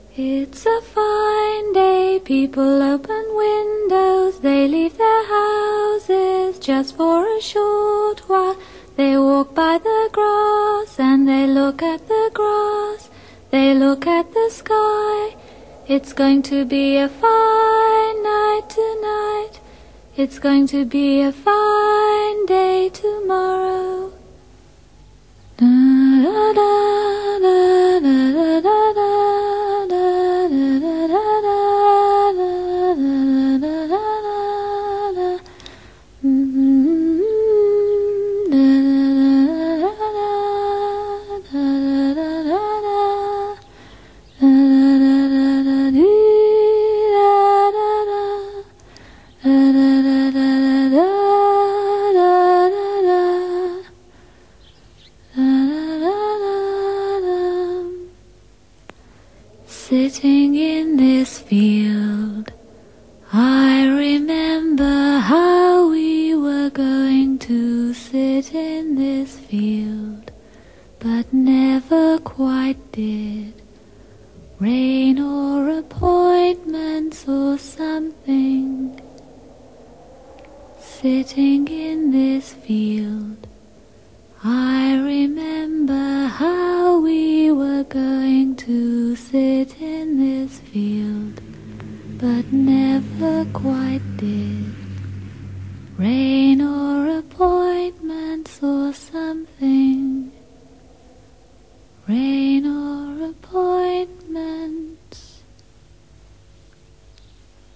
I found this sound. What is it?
rmr morphagene reels - fine day 01
fine day vocals for the make noise morphagene
make-noise, eurorack, morphagene, reels